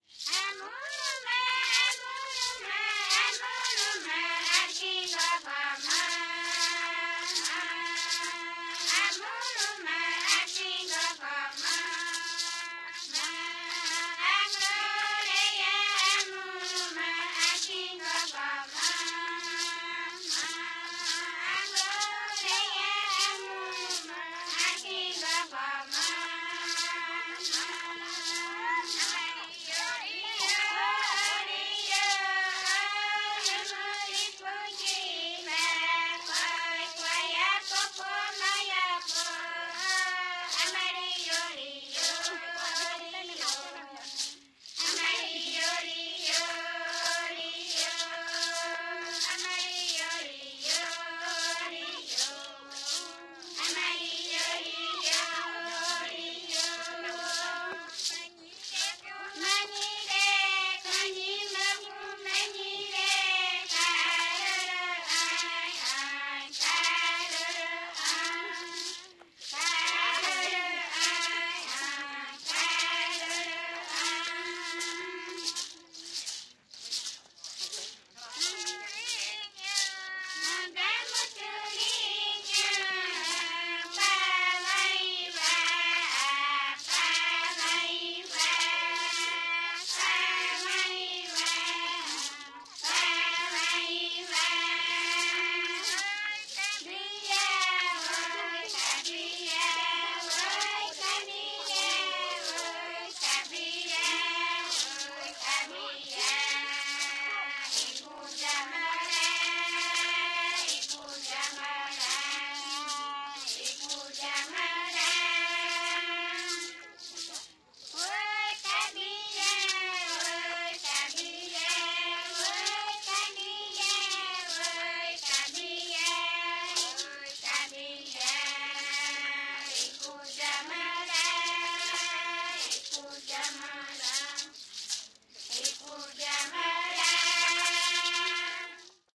Nira's Song number 7 from the "Kayapo Chants". Group of female Kayapó native brazilian indians finishing the ritual of the warrior, in "Las Casas" tribe, in the Brazilian Amazon. Recorded with Sound Devices 788, two Sennheiser MKH60 in "XY".
amazon, brasil, brazil, caiapo, chant, female-voices, field-recording, indian, indio, kayapo, music, native-indian, rainforest, ritual, tribal, tribe, tribo, voice